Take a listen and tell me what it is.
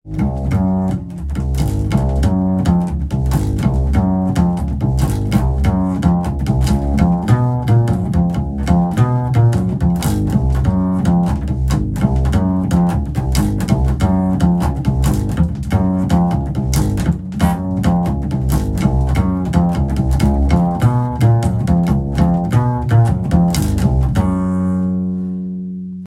Double bass Jazz loop improvistation